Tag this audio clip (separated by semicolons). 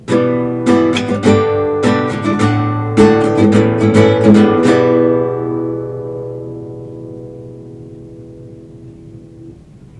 strings; strumming; Guitar